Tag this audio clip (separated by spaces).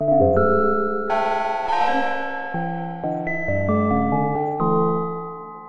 electronic soft-synth Aalto time-stretched prepared-piano Madrona-Labs processed